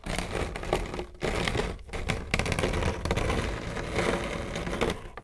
Queneau Gros Frottement 03
prise de son de regle qui frotte
metallic, clang, metal